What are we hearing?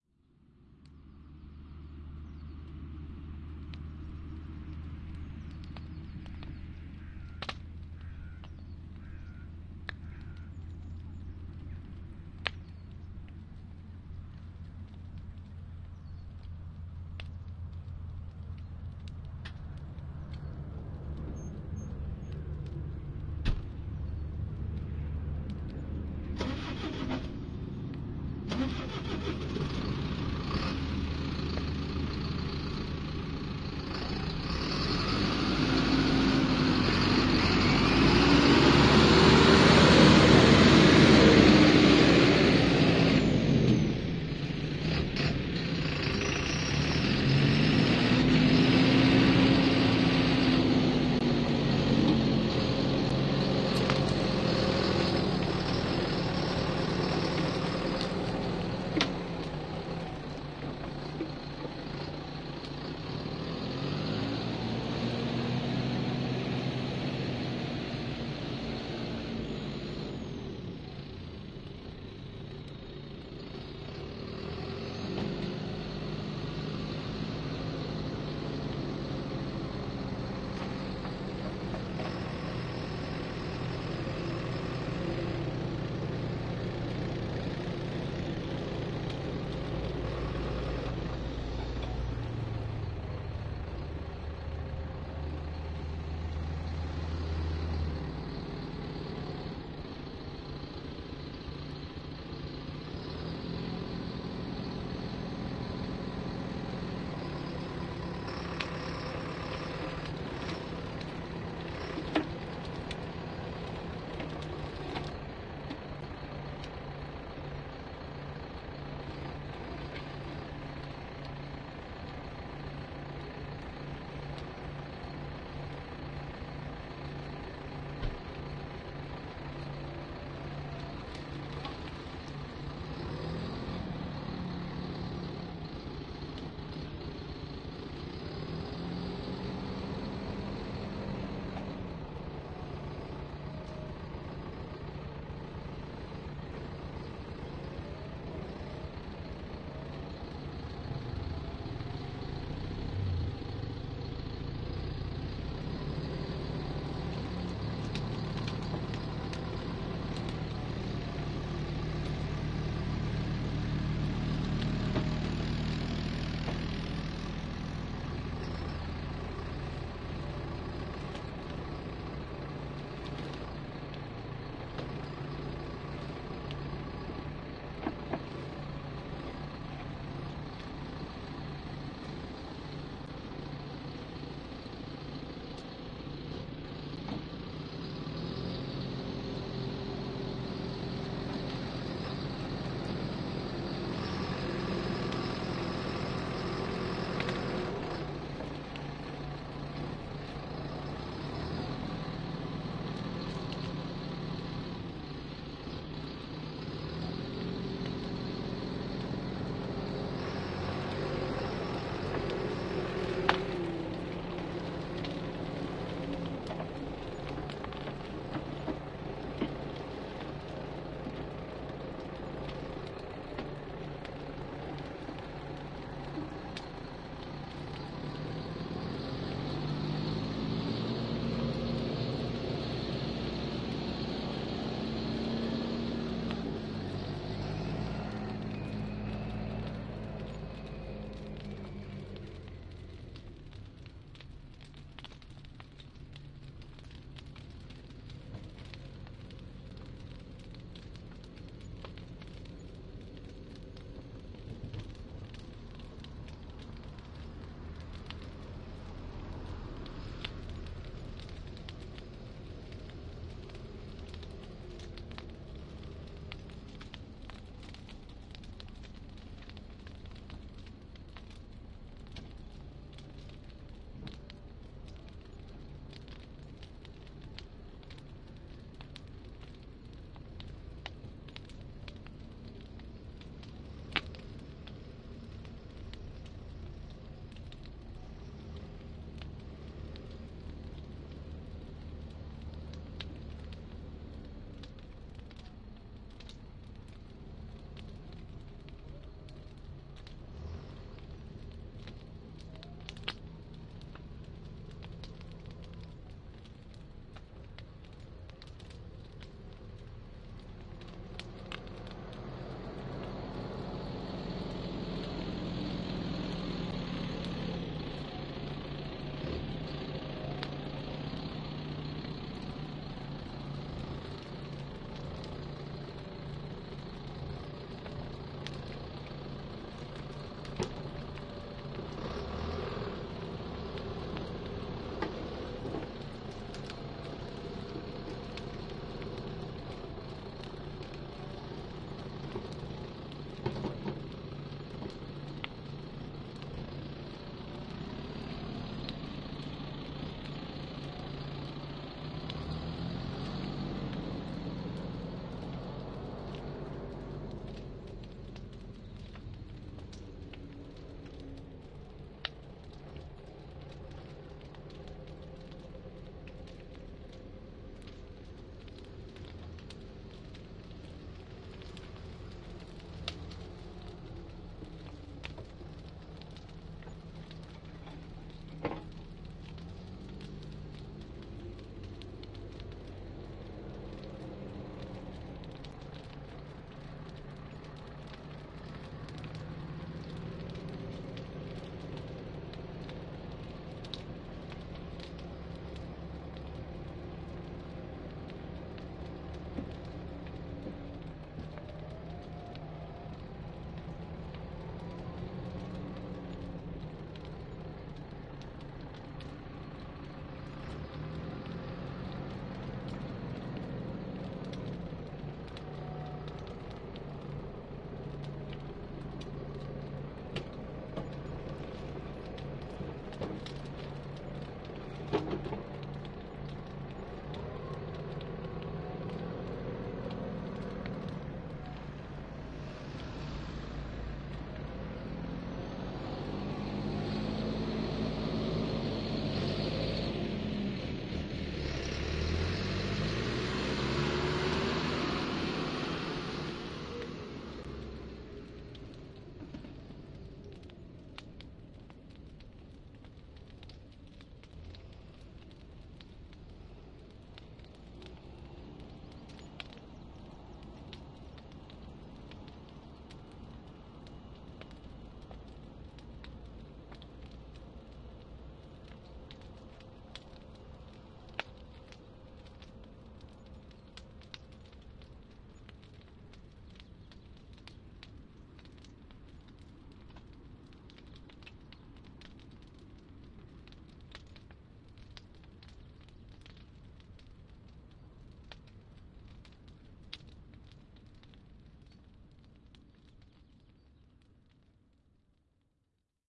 Poking bonfire with tractor
Very large but tame bonfire being stirred by much smaller, large tractor.
Large dynamic range. LARGE increase in volume near the beginning, just after the tractor starts up. Best played about +10dB compared to levels for a typical music CD. Use quality headphones, or stereo speakers spanning about 120 degrees at less than about one metre distance, or an Ambiophonic setup.
Minor roads left and behind, main road 1/4 mile front.
Dummy head 'Ambiophonaural' recording.
Recorded on Roland Quad Capture. My usual setup.
Not ideal recording conditions - in the middle od a field with Landrovers, diggers, etc.scattered around.